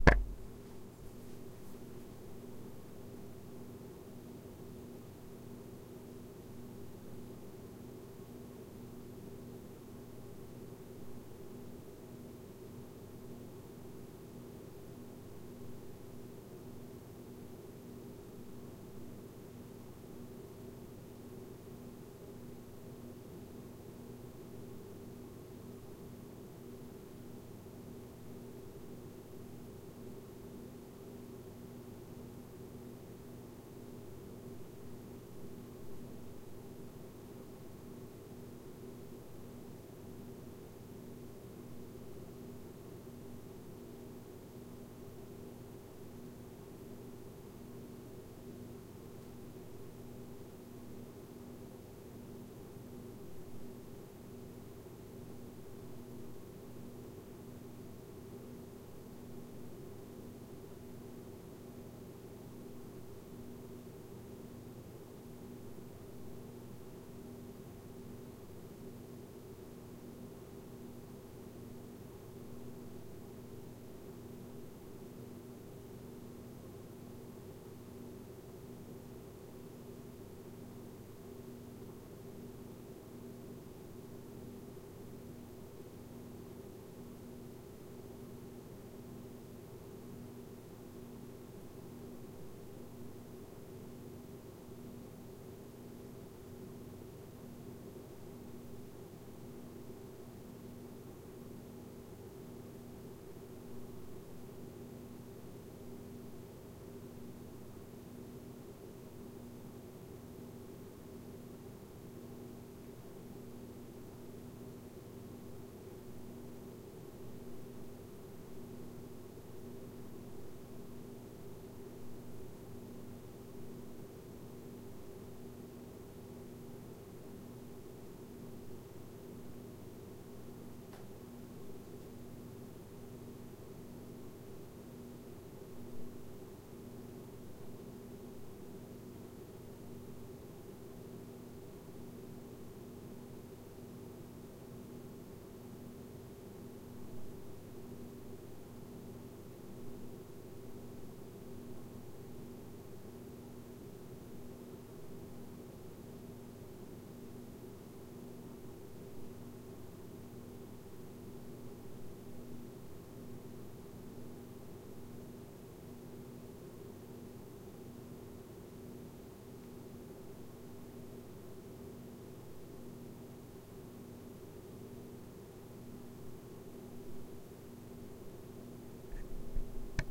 Room white noise - Room Ambience
This is a simple recording of open air white noise of a quite room. I've used this in quite digital passes in some of my original music to help make the digital instrument sound a little less sterile. This is a full unedited take. You will want to crop the start and end sections so you don't here me cutting the recorder on and off ect. I hope you can use this if you need it!
MKII, DR-07, Air, White, Room, Ambience, quite, Clean, Tascam, Open, noise